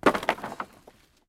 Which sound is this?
Slightly jumping on a pile of wooden rubble.
event, jump, pile, rubble, short, wood, wooden